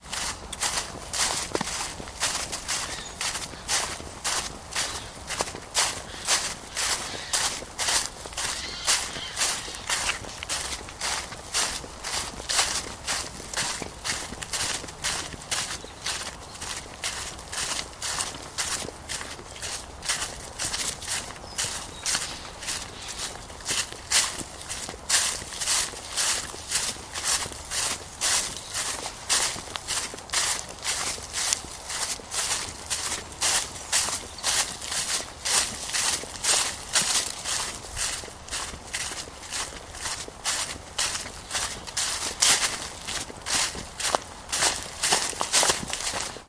Footsteps, Dry Leaves, F
Raw audio of footsteps through dry crunching leaves down a footpath.
An example of how you might credit is by putting this in the description/credits:
crisp, crunch, dry, footstep, footsteps, leaf, leaves